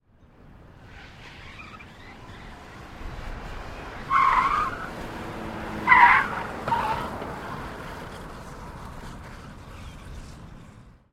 Chrysler LHS tire squeal 02 (04-25-2009)
2 of 4. Sound of a big car accelerating and the tires squealing as it takes some hard corners. Car is a 1996 3.5L V6 Chrysler LHS. Recorded with a Rode NTG2 into a Zoom H4.
tyre, skid, car, tire, squeal, speed, screech